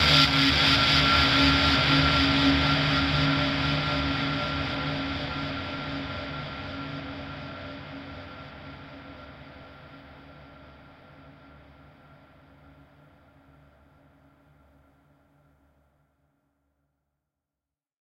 This is a Guitar Reverb used by FM8
Live, Kick, Music, EDM, Nylon, Drum, Funk, Clap, House, Power, String, Drums, Faux, Loop, Electric, 4x4-Records, Acoustic, Guitar, Stab, J-Lee, Bass, Dance, Snare